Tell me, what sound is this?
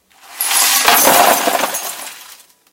Dumping Glass in Trash Can 2
Recorded with a black Sony IC voice recorder.
can, drop, smash, pour, broken, crash, glass, dump, break, trash, rubbish, garbage, tinkle